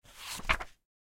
page-flip-10
heavy newspaper fliping by a high quaility sound